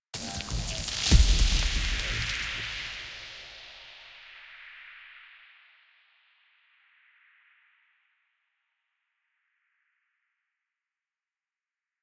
Future Impact
An experimental impact sound made in absynth 5 and alchemy.
electronic, transition, digital, impact, fx, sci-fi, hit, future, effect